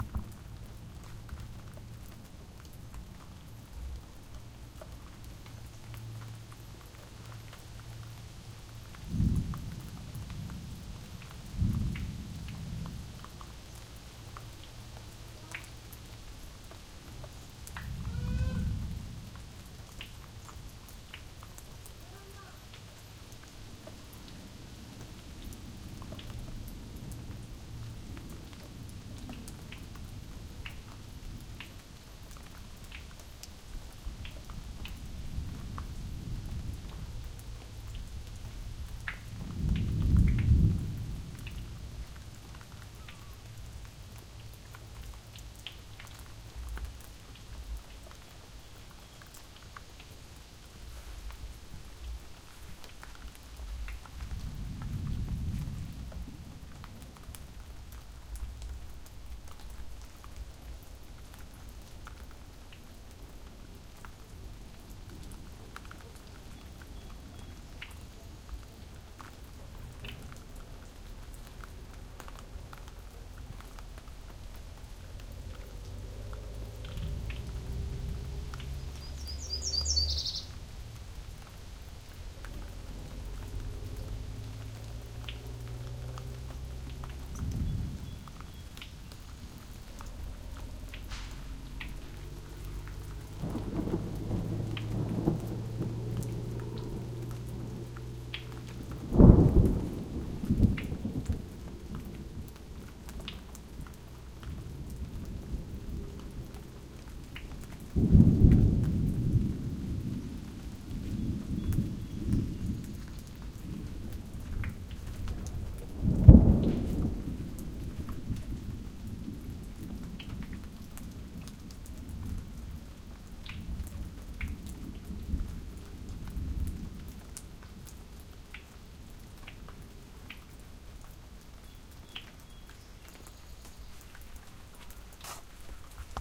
Gentle Rain Trickle with Thunder
recorded at Schuyler Lake near Minden, Ontario
recorded on a SONY PCM D50 in XY pattern
rain, thunder, trickle